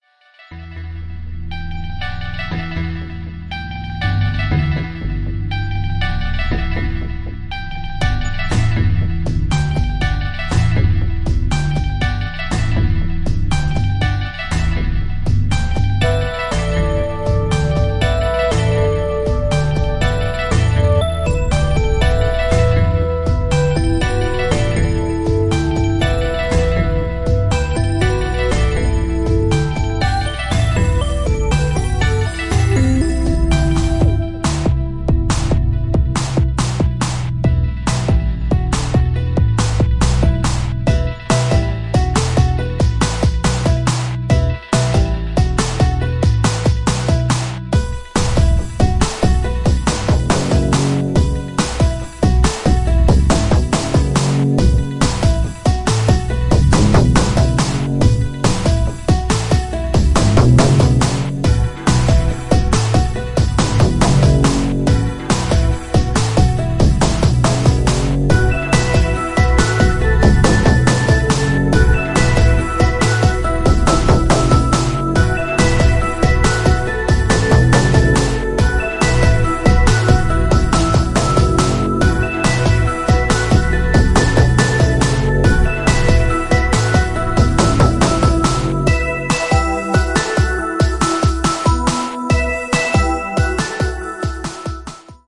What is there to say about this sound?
Electronica Techno
A peculiar electronic / techno song with two part progression.
If you use this in an actual project I would be happy to know :)
song, sidescroller, side, platformer, background, scroller, shoot, playstation, video, 90s, 16, game, electro, music, retro, bass, techno, adventure, bit, electronic, vaporwave, console, 1990s, dos